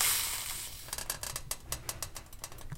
Stove Water Sizzle
Water was put on the bottom of a pot and then placed on a hot stove.